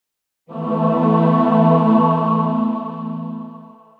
Haaaa Epiphany Choir
"Epiphany" choir vst chords
Created with Native Instrument Absynth
choir, choral, synth, vst, epiphany, synthesizer